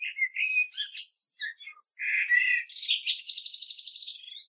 Turdus merula 15
Morning song of a common blackbird, one bird, one recording, with a H4, denoising with Audacity.
bird, blackbird, field-recording, nature